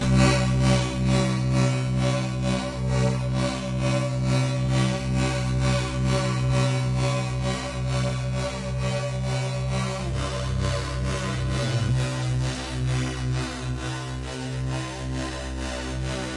sound of my yamaha CS40M analogue
sample, synthesiser, fx, analogique, sound